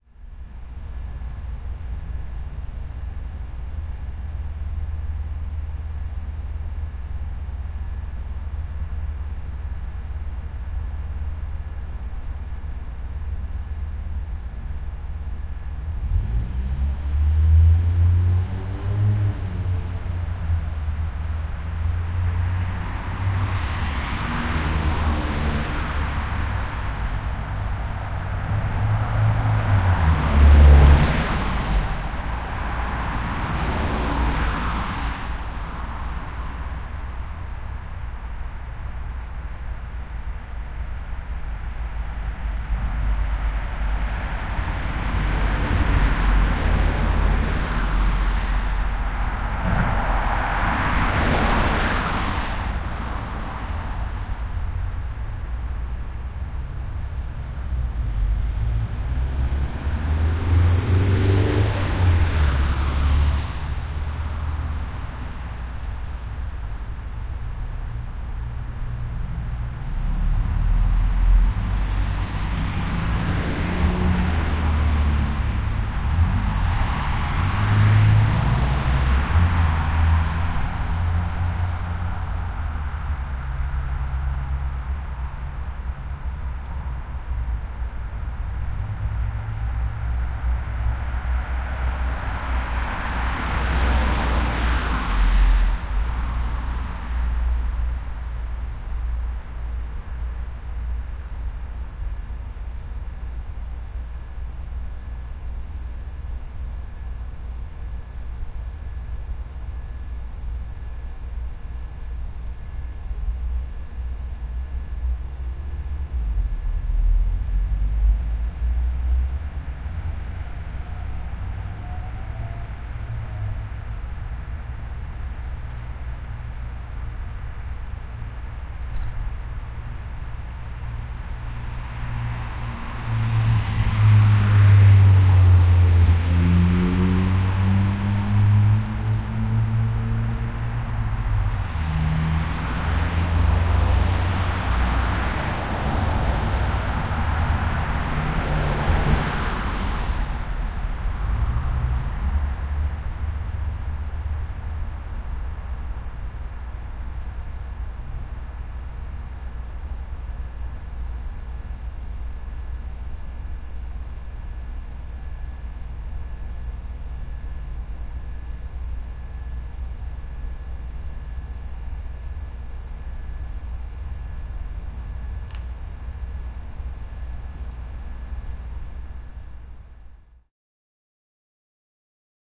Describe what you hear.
Night Cars
Nighttime car traffic, slight electrical hum in background.